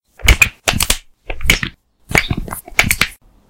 broke my wrist so I can make this sound.
Please enjoy!